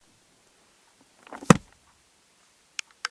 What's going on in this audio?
Close book
A book being forcefully closed.
close, shut, closing-book, book, slam